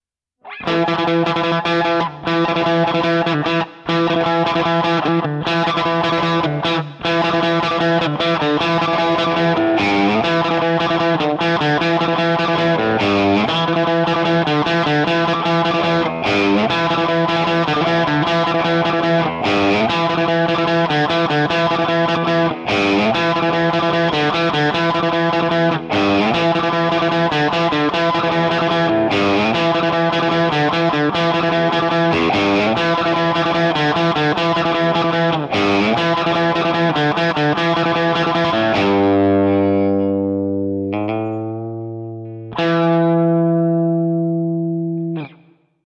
rattle that E
low distortion, almost surf rock but a bit of country. Or more western than country. Maybe even call it somebody trying to run out of Texas into New Mexico only for the engine to sputter every few seconds
electric, surf, licks, western, guitar, clean, country, running, e, notes, texas, sorta